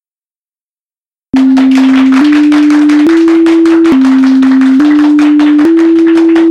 applause with do re mi